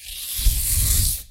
A thoroughly equalized close-up of the sound made by scratching paper